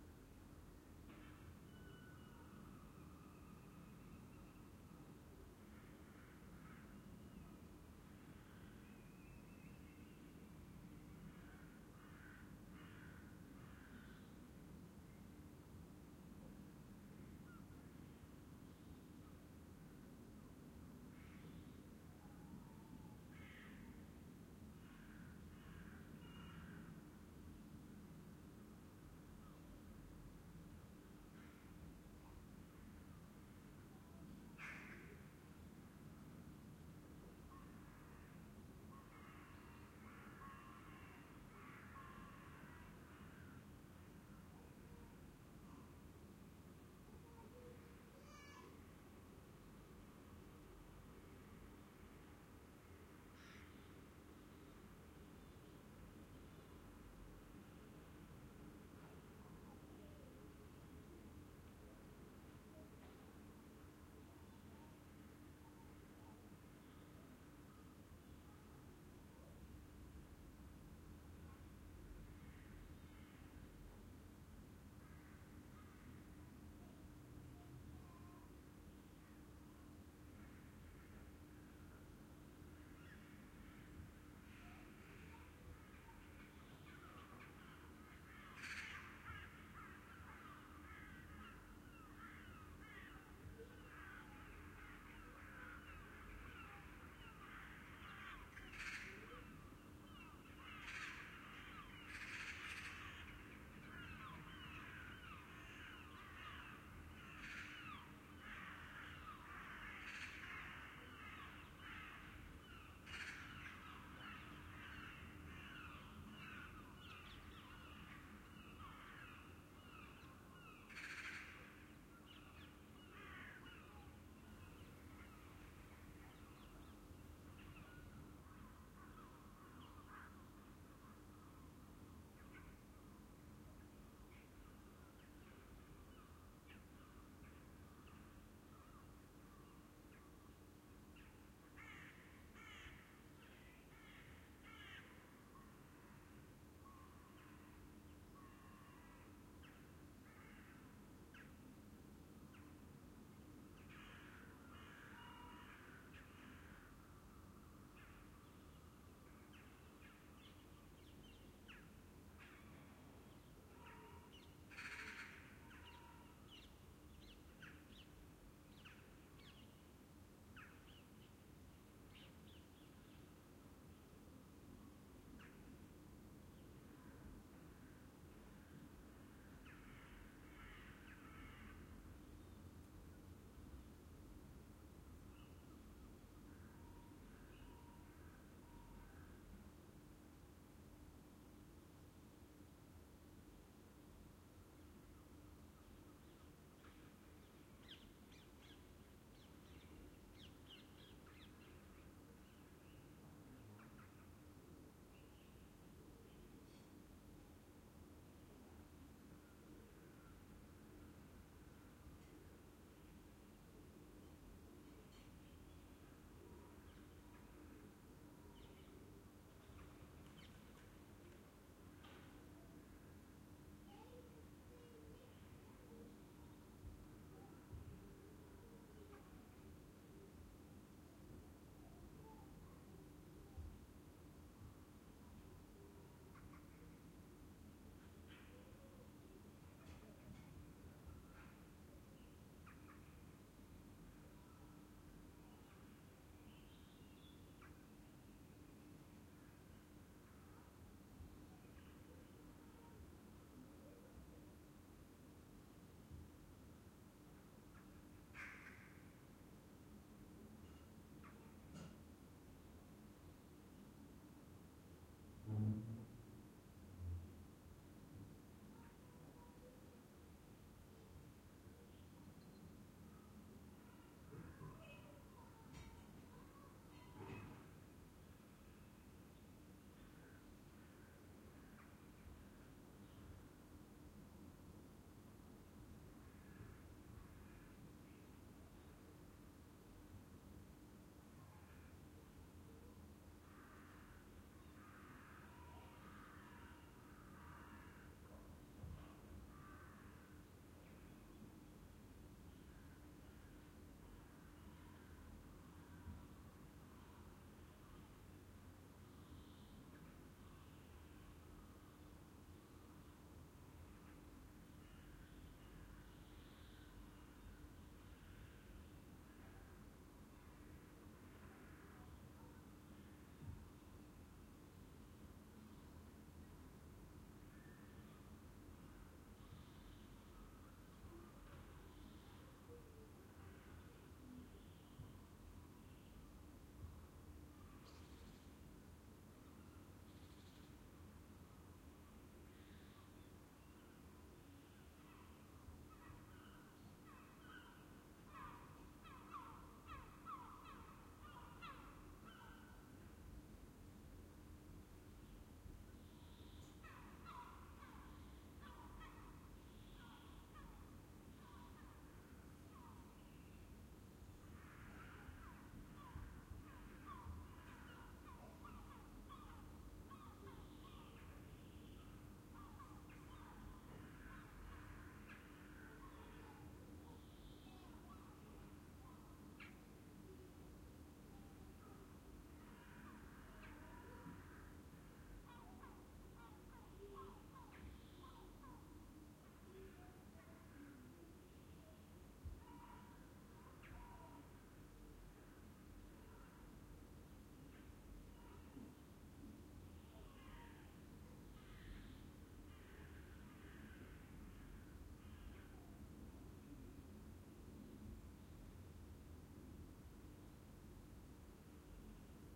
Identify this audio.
roomtone sunday open
room-tone ambience of a small-room with open window on an early Sunday morning in May.
MJ MK319-> ULN-2.
Amsterdam, the-Netherlands, roomtone, room-tone, spring, morning, ambience, open-window, background, birds, sunday